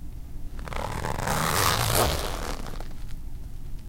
This is a coat zipper, unzipping all the way.